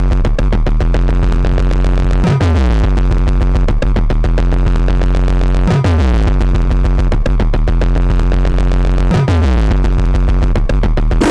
Freya a speak and math. Some hardware processing.